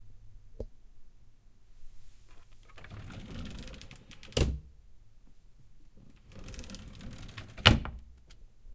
This is the sound of me opening and closing my heavy dresser drawer.
heavy, dresser, drawer